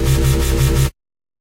DnB&Dubstep 012
DnB & Dubstep Samples